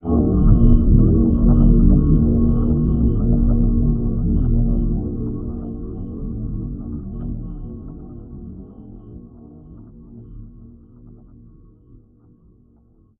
Echoing Bubbling Under Water Longer
SFX. Sounds like slow diving into deep dark water
long-echoing, under, water